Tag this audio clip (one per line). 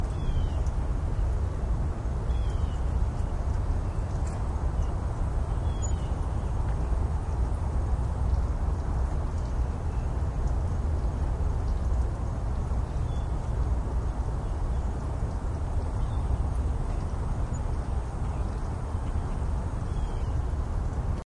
field-recording
walking